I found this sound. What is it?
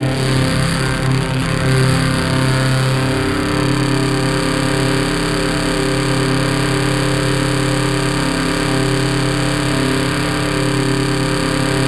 Binaural Ringmod Texture from Reason Subtractor and Thor Synths mixed in Logic. 37 samples, in minor 3rds, C-1 to C8, looped in Redmatica's Keymap. Sample root notes embedded in sample data.